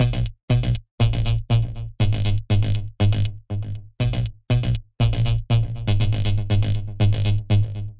bass f e dd 120bpm delay
bass, club, compressed, dance, distorted, dub-step, effect, electro, electronic, fx, house, loop, rave, synth, techno, trance